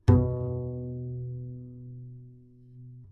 Double Bass - B2 - pizzicato
Part of the Good-sounds dataset of monophonic instrumental sounds.
instrument::double bass
note::B
octave::2
midi note::47
good-sounds-id::8731
B2, double-bass, good-sounds, multisample, neumann-U87, pizzicato, single-note